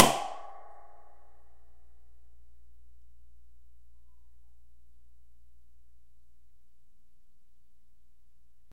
springmic pophifi
My first experimental attempt at creating impulse responses using a balloon and impact noises to create the initial impulse. Some are lofi and some are edited. I normalized them at less than 0db because I cringe when I see red on a digital meter... after reviewing the free impulse responses on the web I notice they all clip at 0db so you may want to normalize them. They were tested in SIR1 VST with various results. Recorded with "magic microphone", China's slave labor answer to the spring reverb, courtesy of Walmart, where some of the lofi impulses were actually recorded.
convolution, free, impulse, ir, response, reverb, spring, vintage